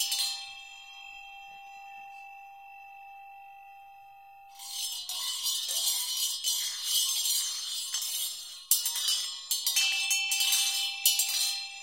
Tibetan bells loop
Nicely looped bells, struck and spun together like potlids. It's a shorter loop so probably best along with other things.
looped, tibet, loop, bell, silver, sustain, buddhist, cinematic, buddhism, bells, monks, tibetan, mystical, meditation